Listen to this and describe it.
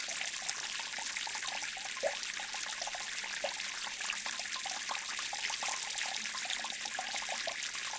running water, soft and bright
stream, water, outpouring, nature, field-recording, fountain